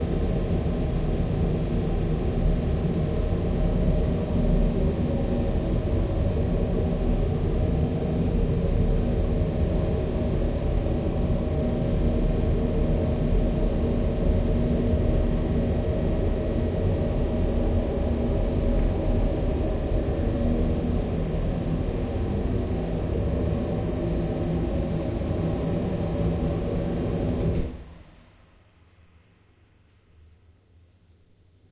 oil burner /
Zoom H4 recorder / Soundman OKM II classic studio binaural mics